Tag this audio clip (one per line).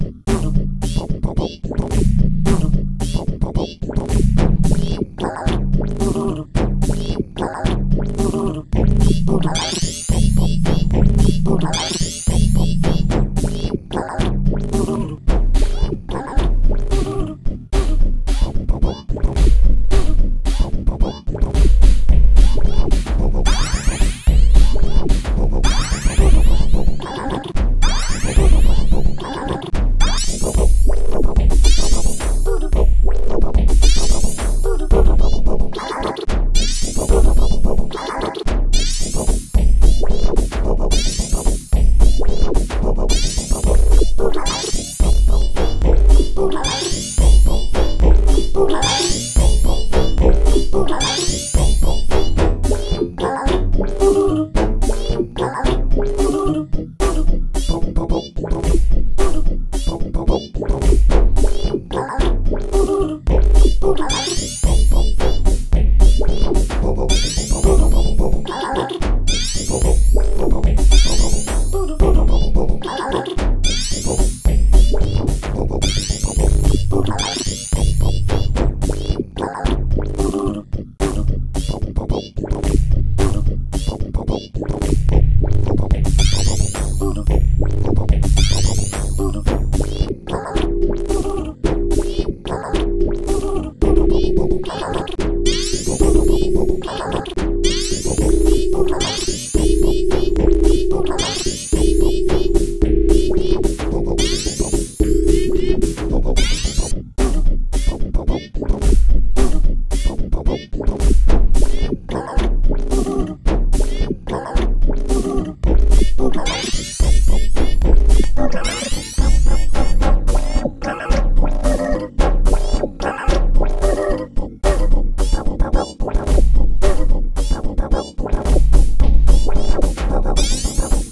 techno
rhythm
step
110
loop
Jiggerwurst
bpm
club
rock
beat
hip
Drum
jazz
edm
hydrogen
dub
rap
trap
hop
music
house